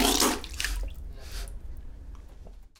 Spit Water
drink, water